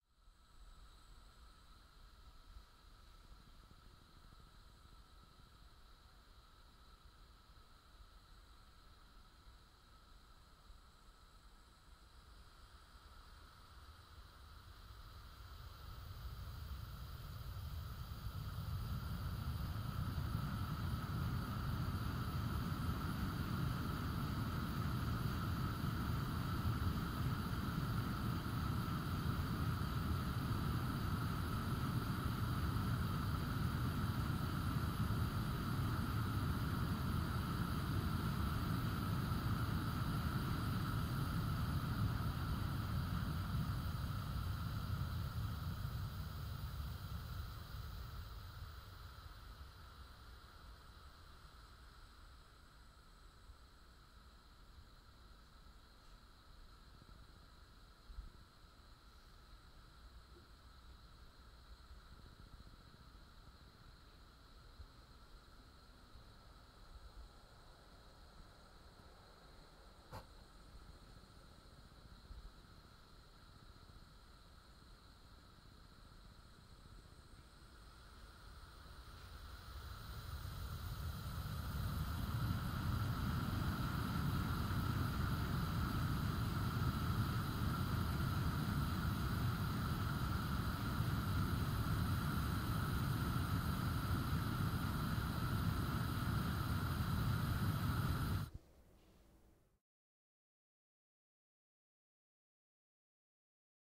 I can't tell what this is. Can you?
Gas stove flame recorded at different intensities.